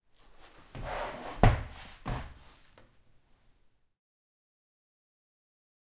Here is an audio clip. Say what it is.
moving a chair on a wooden floor
eating, drinking, Czech, chair, furniture, canteen, wood, Pansk, CZ, floor, drink, food, Panska